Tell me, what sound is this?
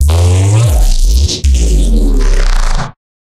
Crunchy Vowel 5
Vocoded bass using my voice and a saw wave. Then resampled multiple times using harmor, followed by reverb techniques.
Bass, Crunch, Fourge, Neuro, Vocoder, Vowel